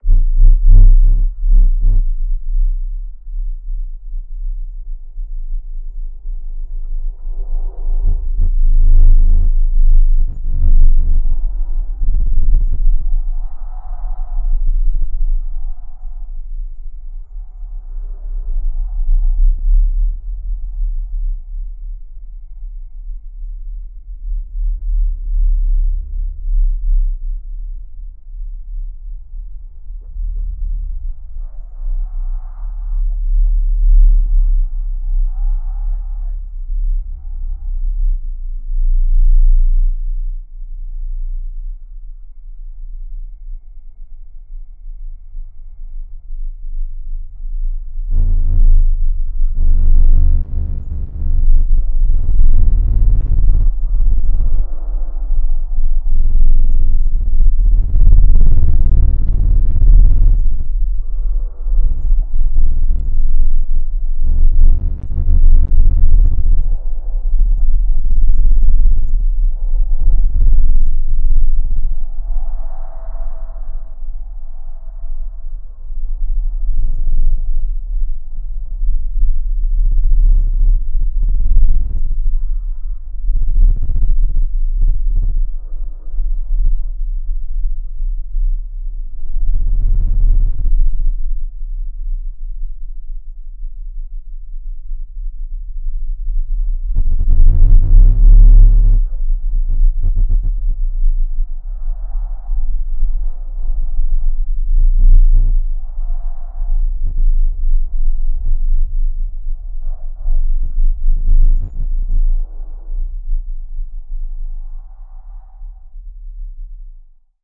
Looooow Bass
a very low bass frequency, sounds horrible, BEWARE OF YOUR SPEAKERS AND YOUR EARS, DON'T HAVE VOLUME TURNED UP A LOT!!!!!!!!
bass, brown, low, noise, sub